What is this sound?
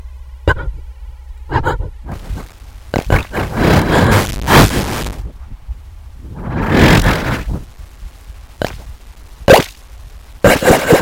Last night I finished these but actually i did them months and months ago... Pills.... ahh those damn little tablet that we think make everything O.K. But really painkillers only temporarily seperate that part of our body that feels from our nervous system... Is that really what you want to think ? Ahh. . Puppy love..... Last night was so...
processed, weird, kaoss